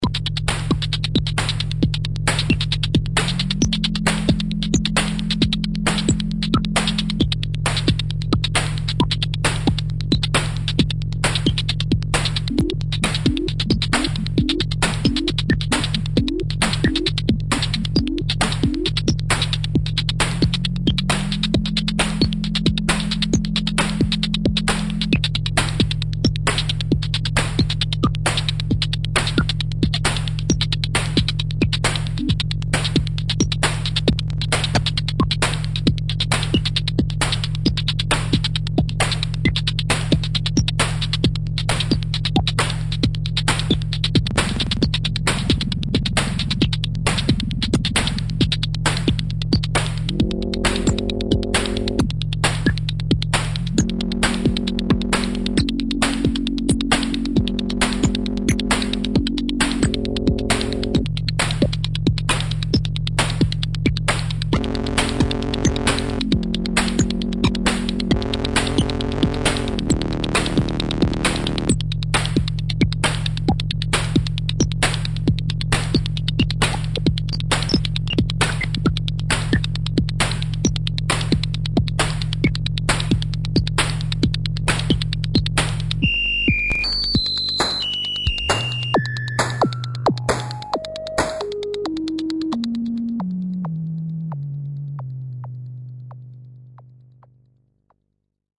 Analog synthesizer drum patch